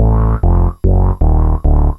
handplayed bass sounds on a korg polysix. dry, without last note.
korg, polysix, synthe